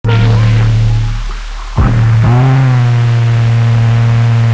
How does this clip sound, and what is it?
res out 11
In the pack increasing sequence number corresponds to increasing overall feedback gain.
computer-generated, chaos, automaton, feedback-system, neural-oscillator, synth